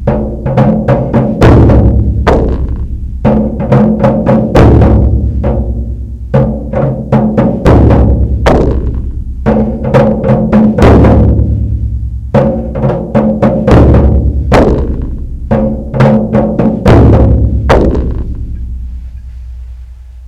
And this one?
Own design. In this case 6 drums involved. I try to get clean sounds with as little rattle and battle as possible.
beats, drum, experimental